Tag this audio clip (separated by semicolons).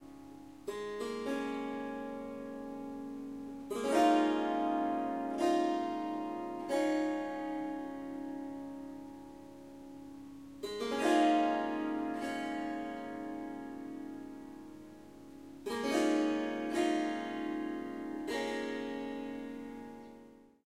Harp; Swar-samgam; Strings; Surmandal; Swarsamgam; Melodic; Indian; Swarmandal; Melody; Ethnic; Riff